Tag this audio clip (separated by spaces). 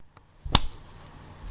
fight earflapping smack